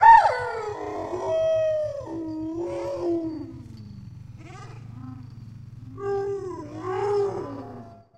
Processed Exuberant Yelp Howl 4
This is a processed version of the Exuberant Yelp Howl in my Sled Dogs in Colorado sound pack. It has been time stretched. The original sound file was the happy cry of an Alaskan Malamute. Recorded on a Zoom H2 and processed in Peak Pro 7.
bark, dog, howl, husky, Malamute, moan, sled-dog, time-stretched, wolf, yelp